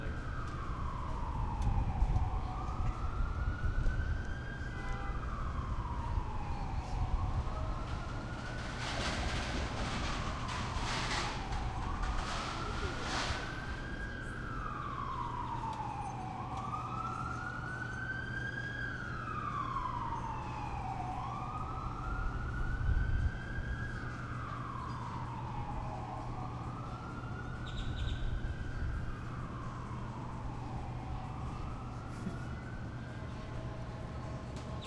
nftp wstend 0408 stationSirenANDtruckoertracks
This is part of the Dallas-Toulon Soundscape Exchange Project; Location: West End DART Station; Time:11:54AM; Density: 2 Polyphony: 2 Chaos/order: 6 Busyness: 2
Description: Police siren in the distance, and a truck drives over the train tracks.
train, dallas, dart, station